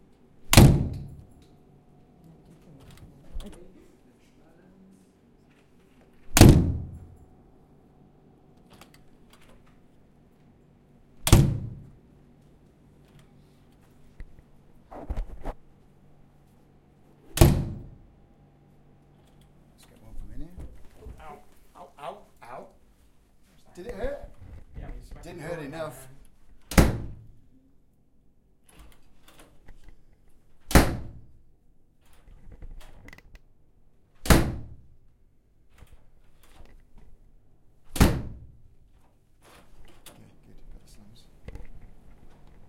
Door Slams and Opens2
A selection of door opens and slams. Standard office panel door. Room noise removed with RX7
Stereo, recorded on a Zoom
close, slamming, opening, closing, door, open, slam